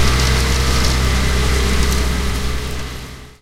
Designa Factory Sounds0006
field-recording factory machines
factory, field-recording, machines